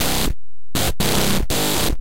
120 Dertill n Amp Synth 02
bit, crushed, digital, dirty, synth